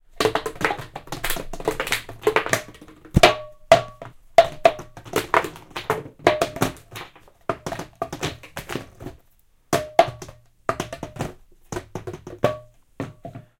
Recorded with Minidisc and stereo in ear mics in my livingroom.